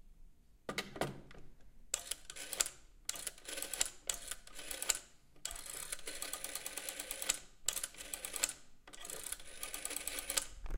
analogic dial telephone
Recording of a dialing with 60's dial telephone.
Sonido grabado con Zoom h2.